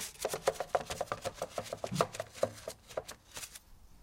Scrapes and taps
hits, scrapes, objects, variable, taps